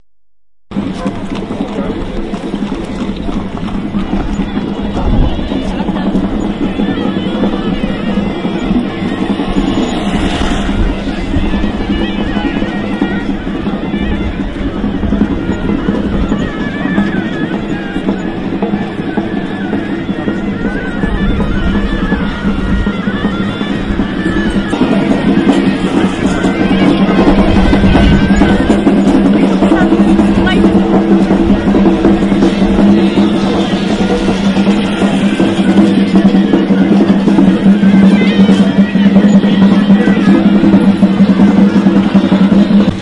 Street sounds from Marrakech, Morocco. Drumming and music, recorded in the Jemar el Fnar market square.